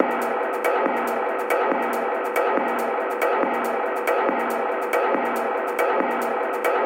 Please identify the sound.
Space Tunnel 5
beat, dance, electronica, loop, processed